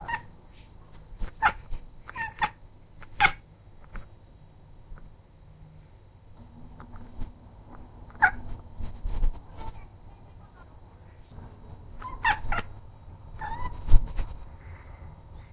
mini miaus
When she was younger, our cat sometimes used to stare at the birds on the tree in our garden and make these tiny meow sounds.
Recorded with a Zoom H1. Noise reduction and volume boost in Audacity.